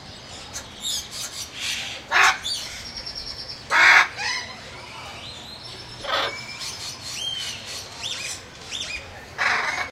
saz parrots
Various parrots calling including Hyacinth Macaw, Thick-billed Parrot, Sun Conure and lorikeets.